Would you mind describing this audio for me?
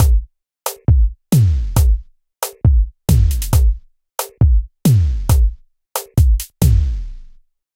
SlowZouk1 68 BPM
Slow zouk drum beat loop
beat, drum, slow